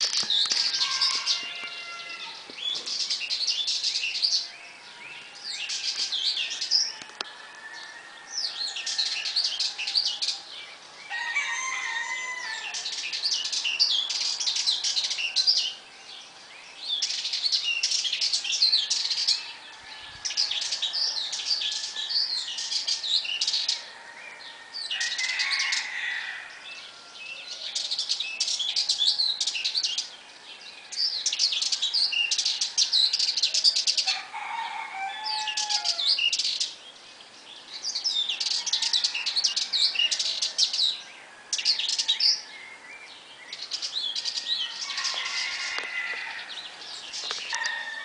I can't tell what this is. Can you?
Morning Sound shot from Tunisia.
morning, birds, rooster, dawn